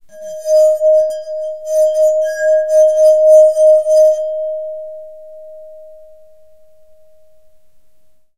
Sliding a finger around the rim of a wet wine glass, which is full of water.